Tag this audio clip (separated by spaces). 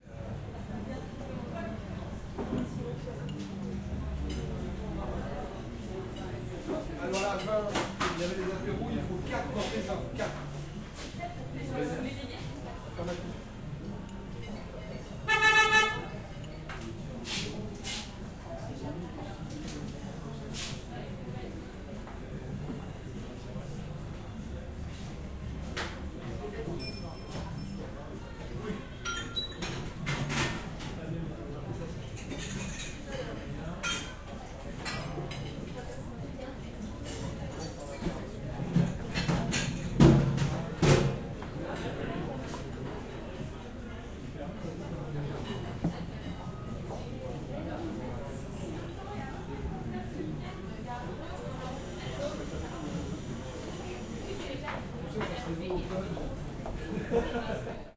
cafe france lunch paris parsian